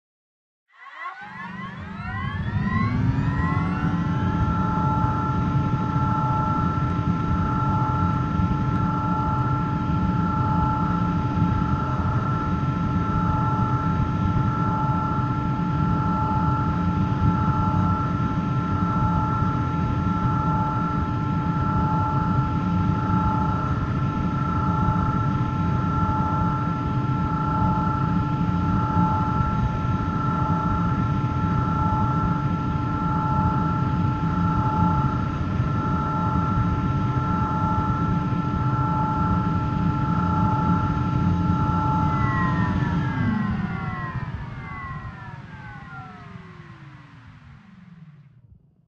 Hover engine 2
Sound of an anti-gravity hover device starting up, humming for a short while and shutting down again.
Created as an experiment for a short film.
Component sounds: A spinning hard drive, a vacuum cleaner and a fridge.